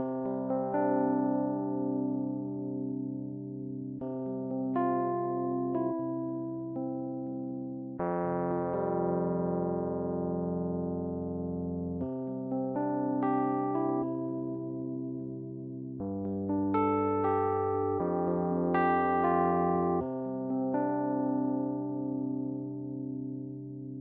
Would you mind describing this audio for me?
Song2 RHODES Do 4:4 120bpms
120, beat, blues, bpm, Chord, Do, HearHear, loop, Rhodes, rythm